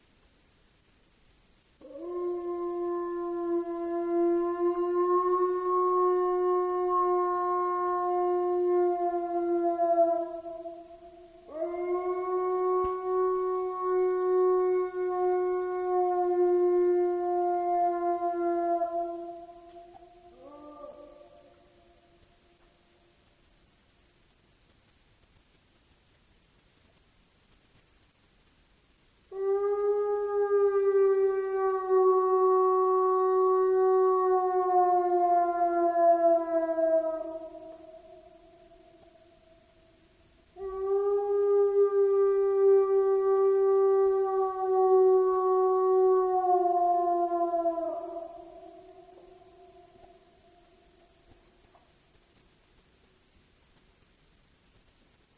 Cooper Creek 20160313 014852 solitary wolf howl very clear
A solitary wolf howls in the Cooper Creek drainage, Wrangell - St. Elias National Park. The creek, itself, is an aufeis-filled reverberate acoustic environment.